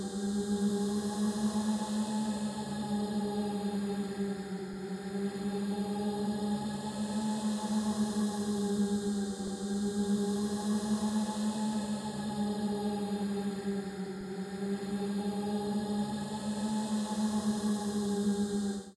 Creepy music
Originally was a Yawn.